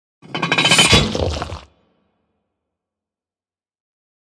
A short guillotine effect I've made combining few samples in logic pro x.
I used Zoom H4N Pro for recording.
acoustic, effect, fx, horror, industrial, killing, machine, moist, processed, sample, soundboard, sound-effect, torture